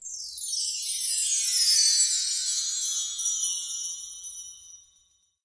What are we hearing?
chimes 5sec gliss down
Descending glissando on LP double-row chime tree. Recorded in my closet on Yamaha AW16-G using a cheap Shure mic.